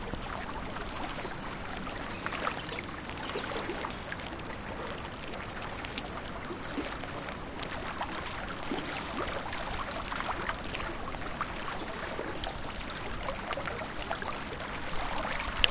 Lake gently lapping the shore. Birds quietly in background. Recorded on LS10 in Lake St Clair, TAS, Australia